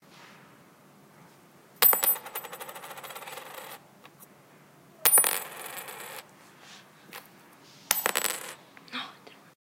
MySounds GWAEtoy CoinRecording
TCR, recording, field